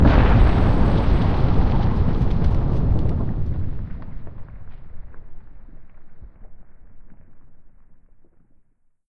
Tectonic Plates Collide
A geological tectonic impact.
Created using these sounds:
rumble, tectonic, bass, smash, boom, crash, collision, geological, explosion, plates